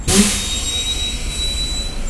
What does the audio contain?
this noise of a bus door opening made me think of building a pack of the sounds I imagine you could (possibly) hear inside a spaceship